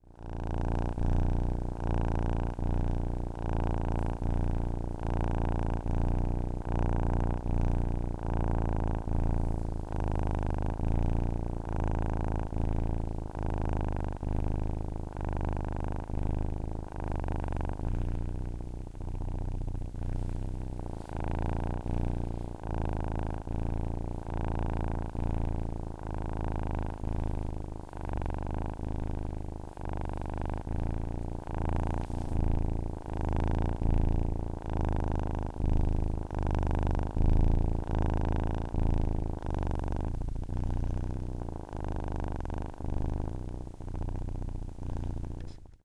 Cat purring
My 3mth old Scottish Fold purring VERY loudly.